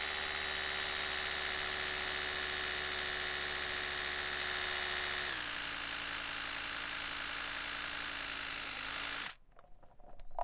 hum of electric toothbrush